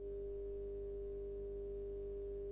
Light Brumm Loop
Sound that a light made at close distance.
brumm, light, noise